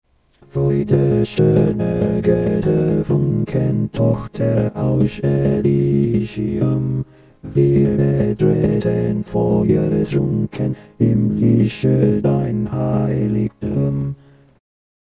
Ode to Joy processed
aspma-14, German-language, STFT-morph